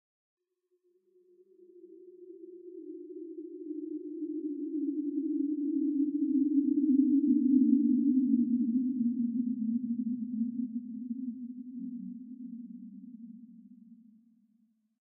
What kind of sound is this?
Longer sequences made with image synth using fractals, graphs and other manipulated images. File name usually describes the sound...
space, image